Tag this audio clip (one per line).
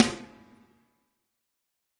14x6
accent
audix
beyer
breckner
combo
drum
drums
dynamic
electrovoice
josephson
kent
layer
layers
ludwig
mic
microphone
microphones
mics
multi
reverb
sample
samples
snare
stereo
technica
velocity